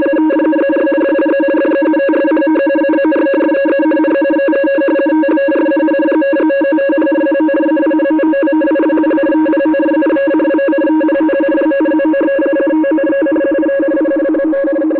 Part of a shortwave radio teletype recording, made with a DX394 High Frequency receiver. The signal is civilian, but could be used as a military sound effect.
communication, digital, electronic, HF, noise, Radio, shortwave, signal, soundeffect, Teletype